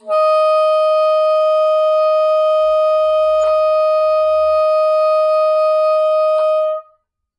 One-shot from Versilian Studios Chamber Orchestra 2: Community Edition sampling project.
Instrument family: Woodwinds
Instrument: Bassoon
Articulation: sustain
Note: D#5
Midi note: 75
Midi velocity (center): 63
Microphone: 2x Rode NT1-A
Performer: P. Sauter
bassoon, dsharp5, midi-note-75, multisample, single-note, sustain, woodwinds